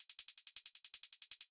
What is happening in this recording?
808 side chained hats (160pm)
Just a simple side chained 808 hat loop
hihat, percussion, hat, 808, closed, 160bpm, drums, juke, sidechain, footwork, hi-hat